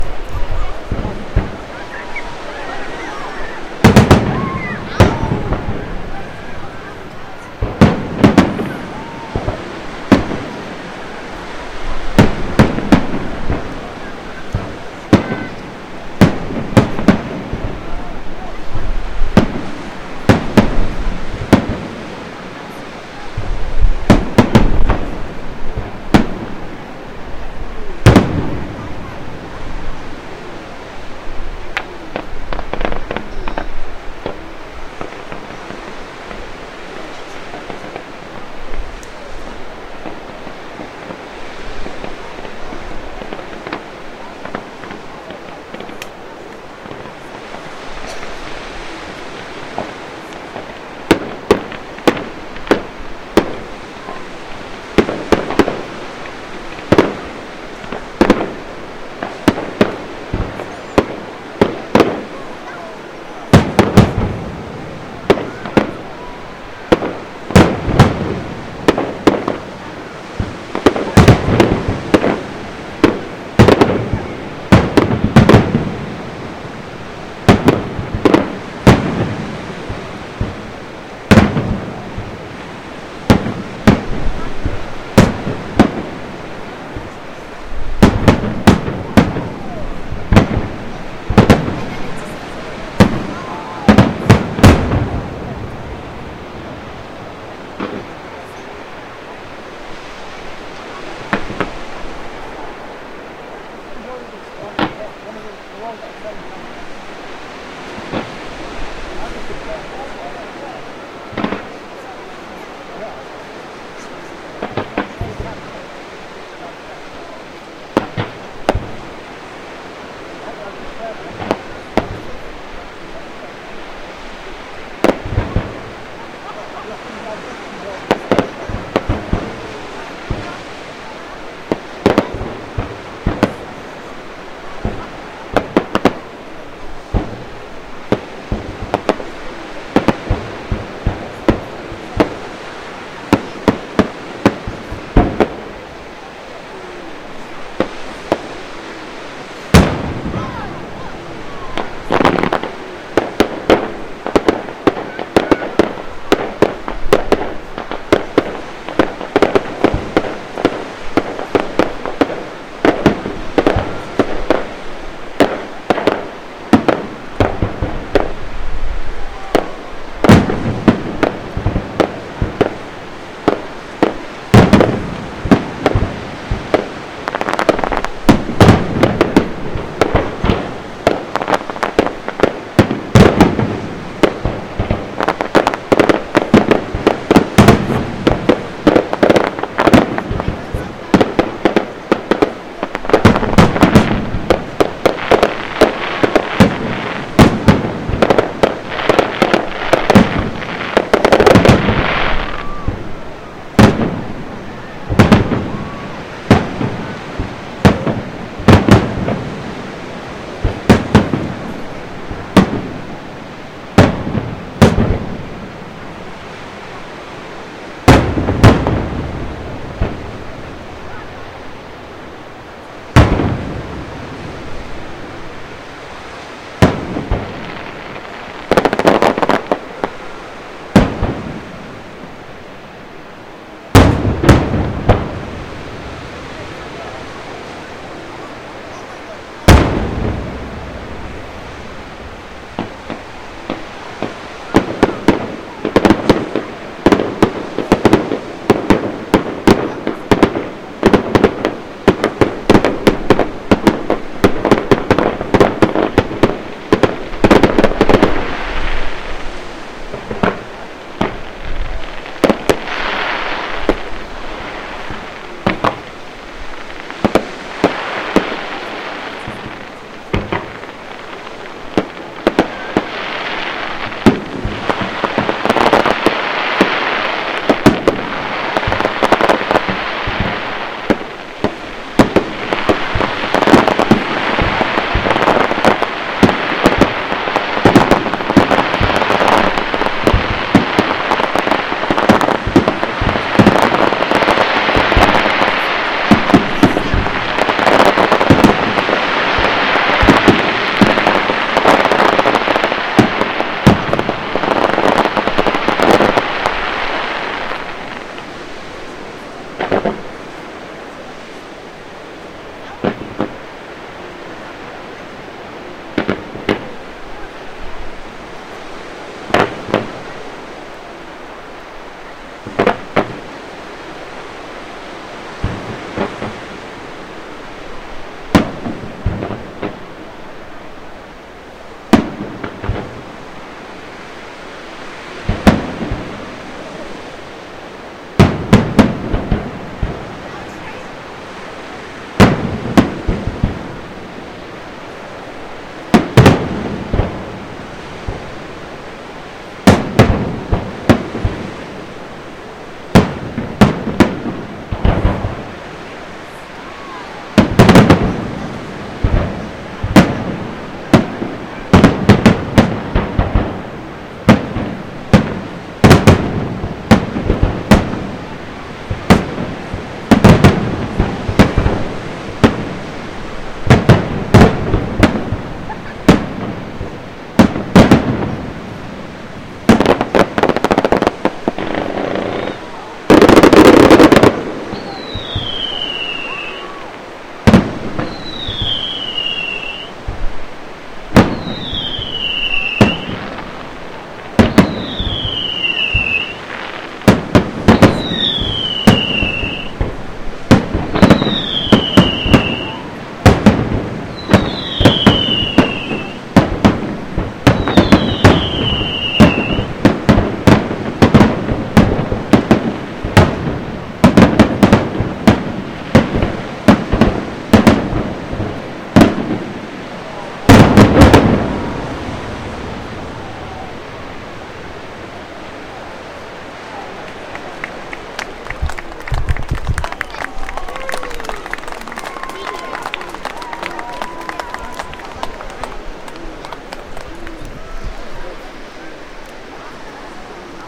Summer Fireworks on the Beach
Friday night firework display in Bournemouth, UK. August 13th 2021. Field Recording of the whole display on the pier. From about a kilometre distance. Waves on the beach, crowds. Some wind noise. Applause at the end. Zoom H4n Pro woth Rode Videomic.
Field-Recording, beach, Crowd, Fireworks, summer, Bournemouth, evening, West-Cliff